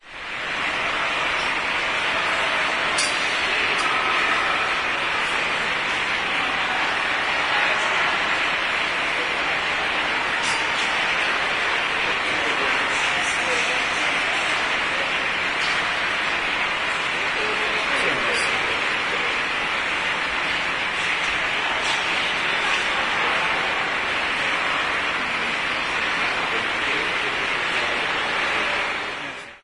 20.09.09: about 17.00, the New Zoo in Poznan. I am inside the elephantarium. There is no elephants only few people. some water, echoing voices are audible. So general ambience of almost empty elephantarium.